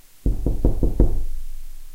Five knocks on closet Door
Five somewhat quiet knocks on a medium sized door. Even though I didn't knock on it that hard, it still sounds as if I did so.